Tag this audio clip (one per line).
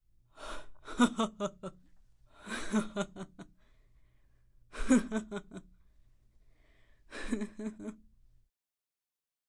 Laughter
Woman